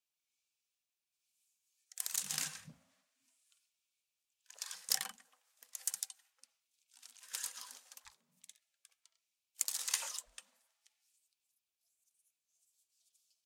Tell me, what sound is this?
moving coat hangers in an metal suport
metal, coat-hangers, moving-coat-hangers